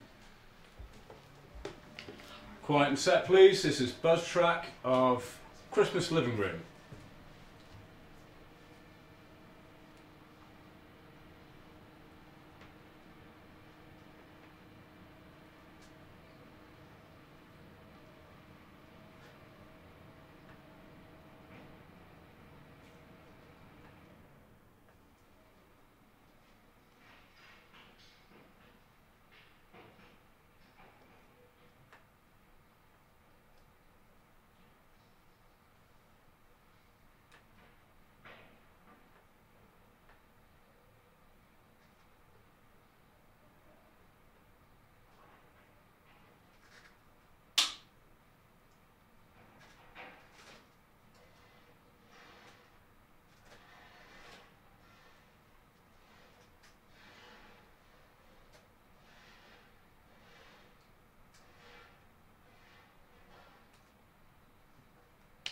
BUZZ TRACK TXMASLIVINGROOM 1
Ok so most of these tracks in this pack have either been recorded whilst I have been on set so the names are reflective of the time and character location of the film it was originally recorded for.
Recorded with a Sennheiser MKH 416T, SQN 4s Series IVe Mixer and Tascam DR-680 PCM Recorder.